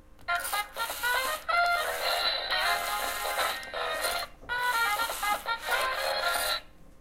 mySound GPSUK toy
Child's electronic toy
Galliard, School, toy, UK